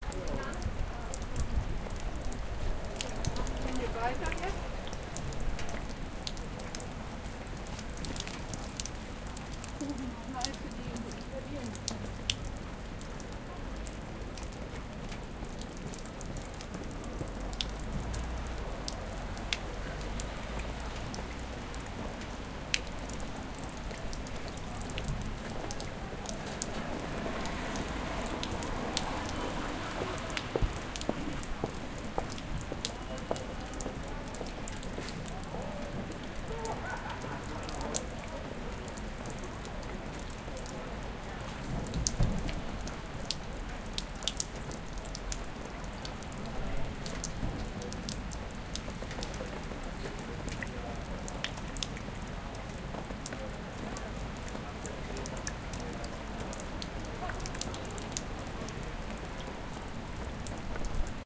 Street Scene - After The Rain - Pedestrian & Raindrops
Recorded with a - Zoom 4n pro - (during and) after the rain in the middle of the city.
ambience, field-recording, noise, city, sound, ambient, traffic, street, people, soundscape